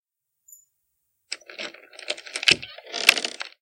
Desbloqueo Puerta G5
Grabacion de efecto al desbloquear y abrir una puerta de madera grande. Microfono piezoelectrico ubicado a 40 cm de la cerradura principal
Efecto, Llaves, Madera, Puerta